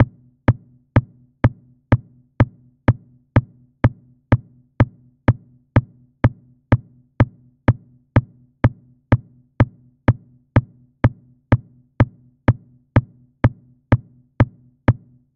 Samsara ESD SnareDrum
house, techno